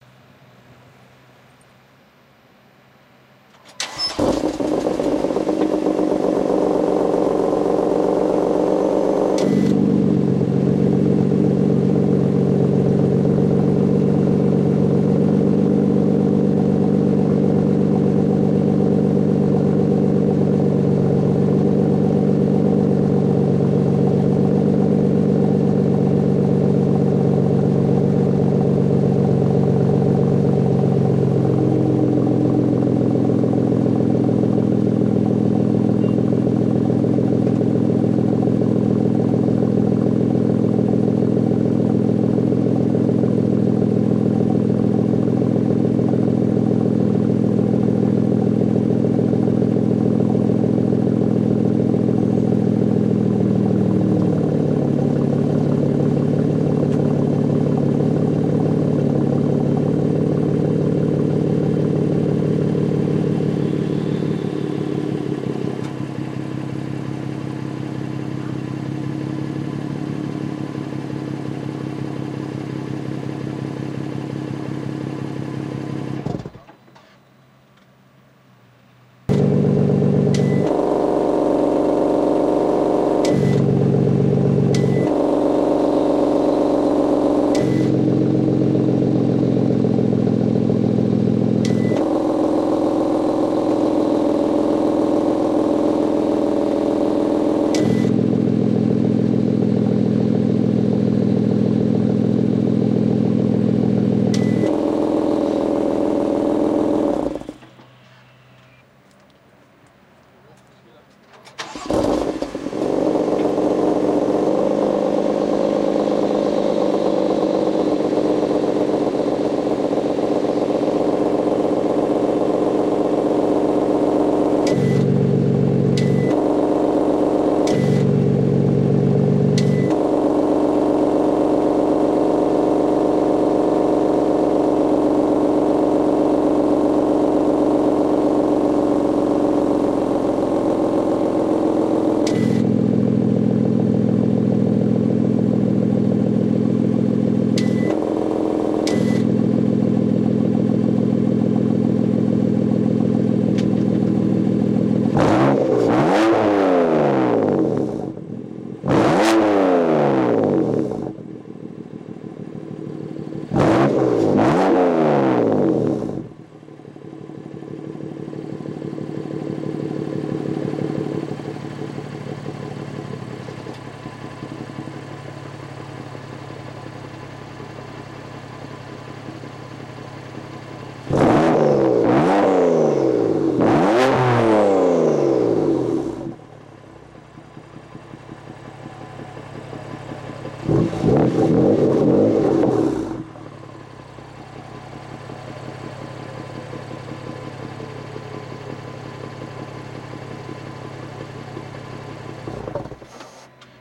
Starting engine of bmw m4. revving at the end.
Is this good quality ?